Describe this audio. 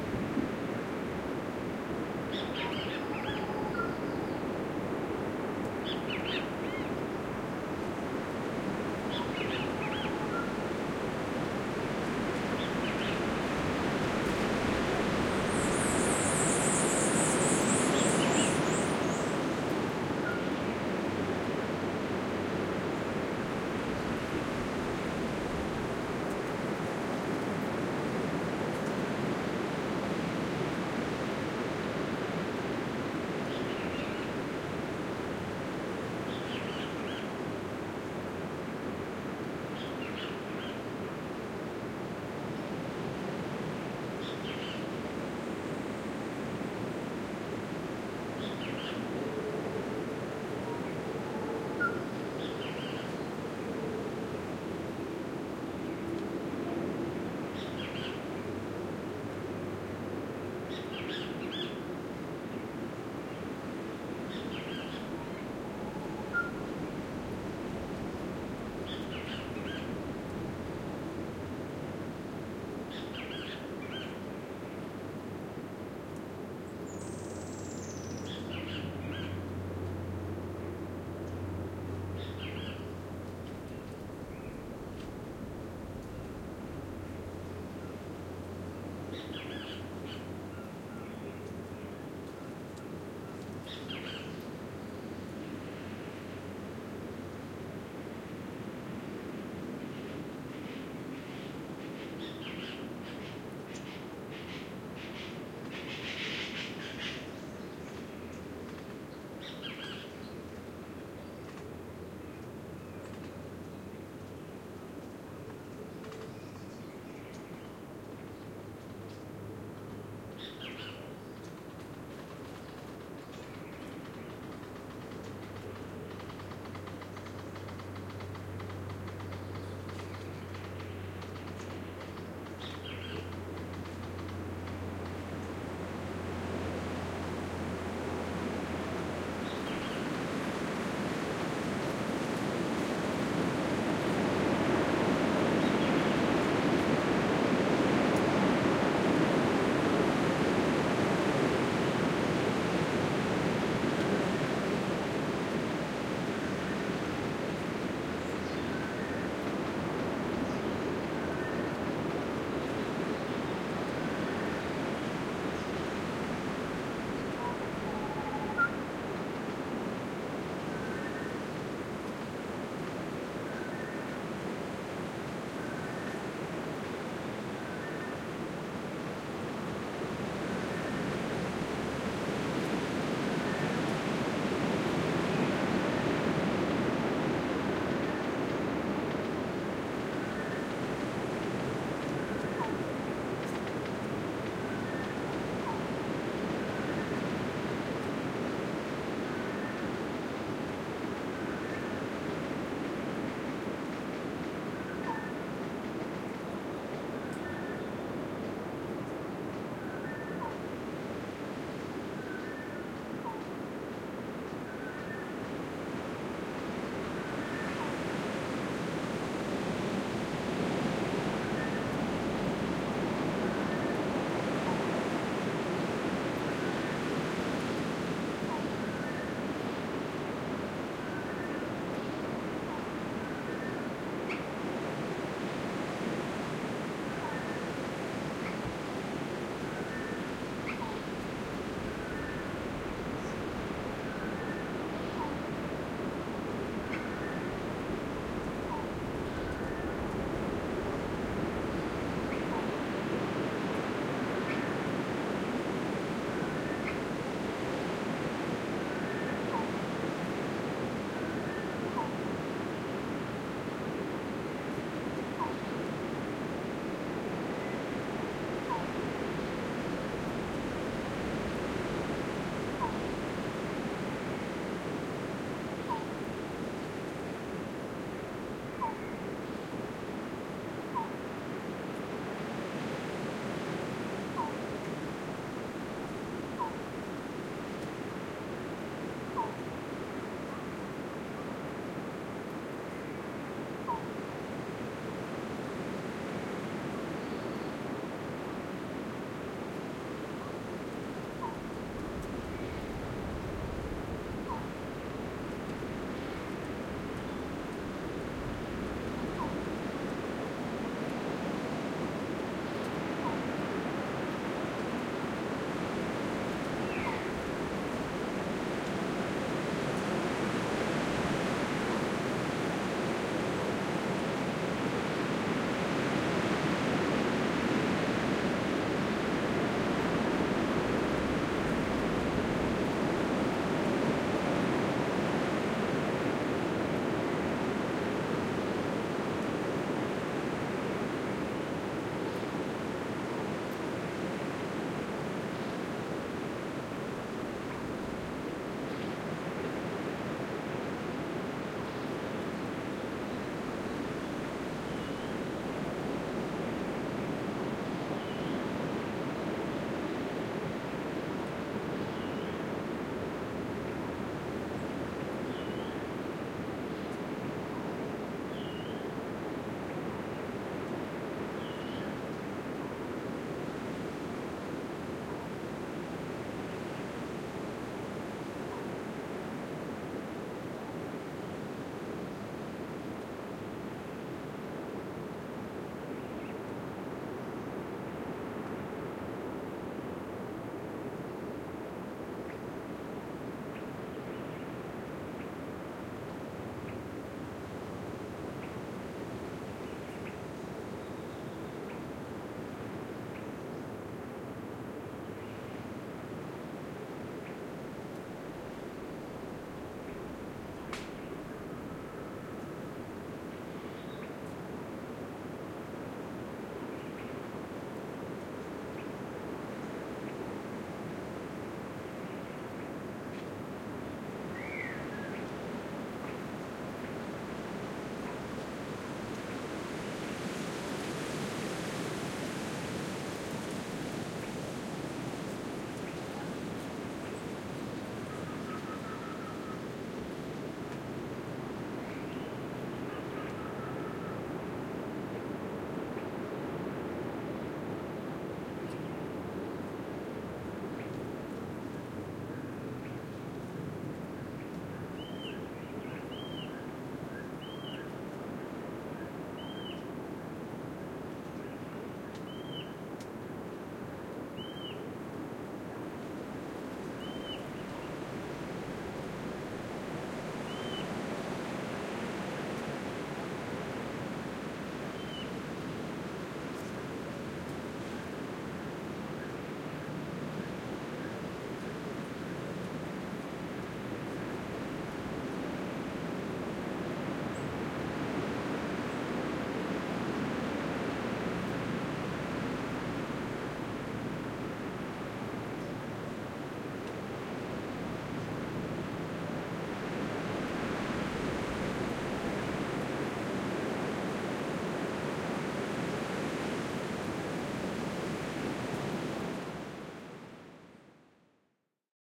cr dry forest morning 03
An ambient field recording of a lower elevation dry forest near Monteverde Costa Rica.
Recorded with a pair of AT4021 mics into a modified Marantz PMD661 and edited with Reason.